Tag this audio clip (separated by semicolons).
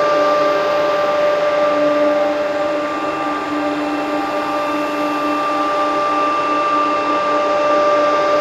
air; ambient; atmosphere; drone; electronic; generative; loop; pad; processed